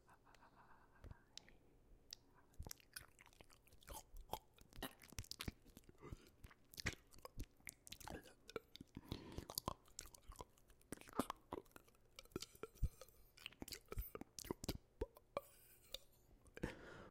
throat sounds
sounds made with the throat and mouth, lots of pops and crackles
throat, pop, gross